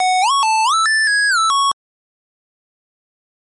it's something.
this shouldn't really matter, but it's at 140 bpm. F#-C#-A-E-A-G#-E-C#
high-pitched, jingle, nes, noise, random